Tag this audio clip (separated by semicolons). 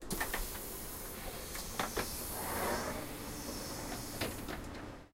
open; door; train